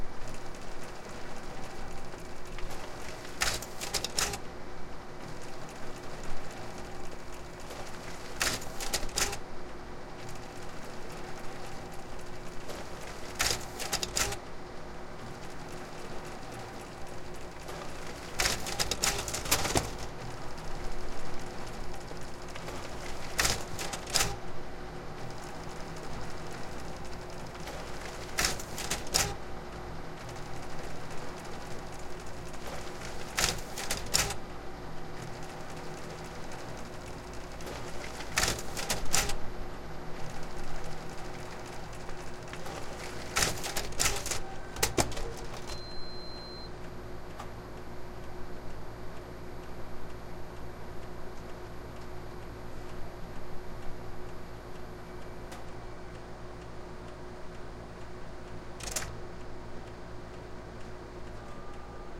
An office photocopier printing several pages and then entering standby.